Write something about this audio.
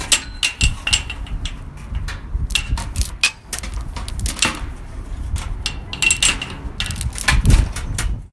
Clanking of metal chain hanging from a fence in Brooklyn. Audible wind. Recorded with iPhone.